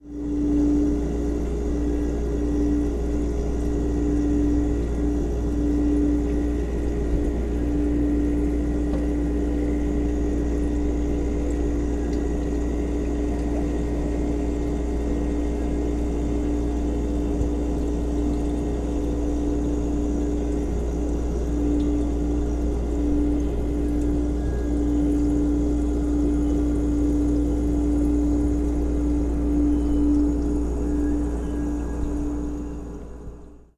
Between the fridge and the wall.